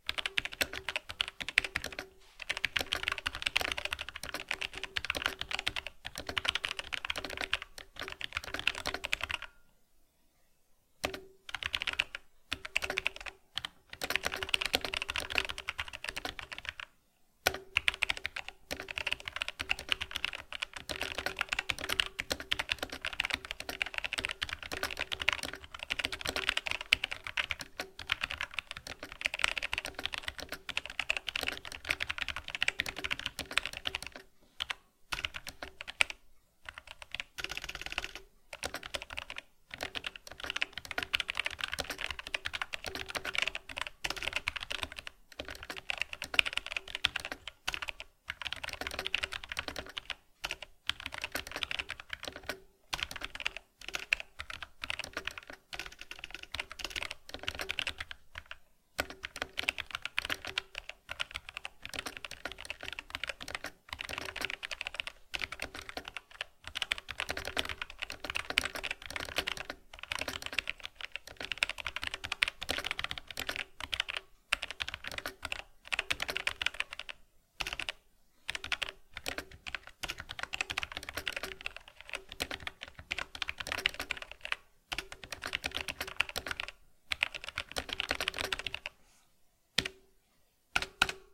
Mechanical keyboard typing
G413 typing sounds. Not particularly noisy. Pretty generic typing sound for universal purposes.
Lately everyone's been hyped over deep thoccs, but I find those pretty unusable for SFX in videos etc., cuz they're just not the typical typing sound.